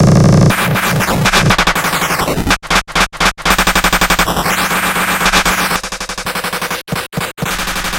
Bend a drumsample of mine!
This is one of my glitch sounds! please tell me what you'll use it for :D
game, console, droid, error, drum, art, robotic, android, bit, Glitch, rgb, experiment, system, spaceship, virus, space, failure, machine, databending, artificial, command, cyborg, robot, computer